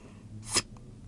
zap cartoon
done with human voice
comic, anime, animados, cartoon, dibujos